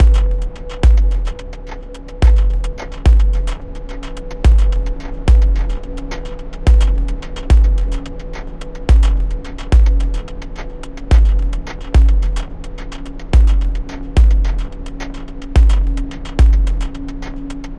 dr loop 2005011 108bpm
drum-loop drums loop 108bpm
108bpm, drum-loop, drums, loop